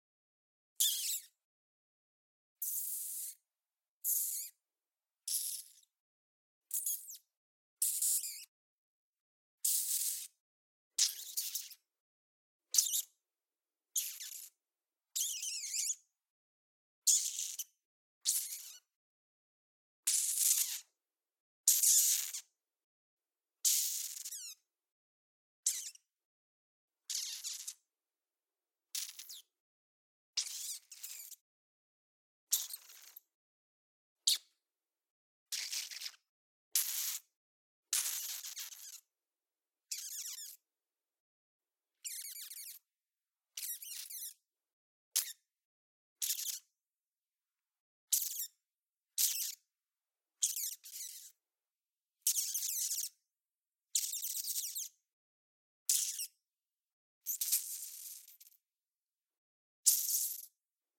Spider Foley 01
Foley sounds made with mouth. This was intended to be spider noises for a video game, but some of them can also sound like a rat.
CAD E100S > Marantz PMD661
screech, rpg, game-foley, animal, spider, rat, bat